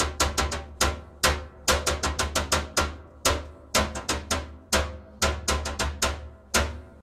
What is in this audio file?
crowd drums 3
Crowd Drum Roll
people, drums, crowd